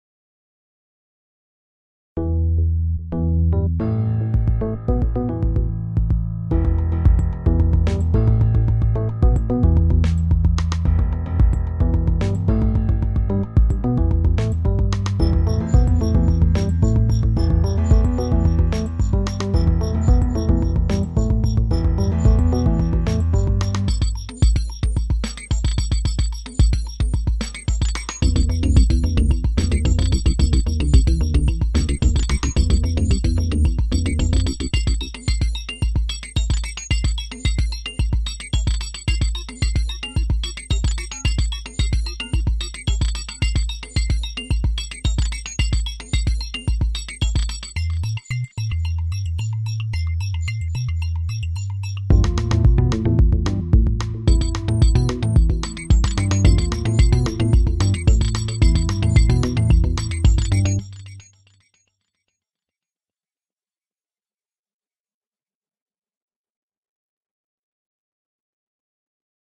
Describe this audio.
Exp MentalShort
Created using Garageband. Mixed up with various instruments.
Short, Experimental, Ambient